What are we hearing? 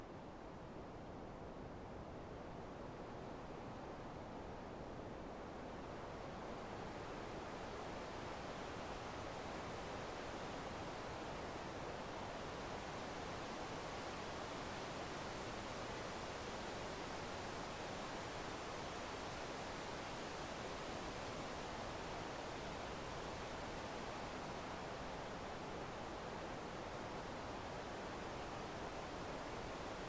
noise shore
Part of a collection of various types and forms of audionoise (to be expanded)
noise, noise-dub, silly, dub, sweet, glitch, soft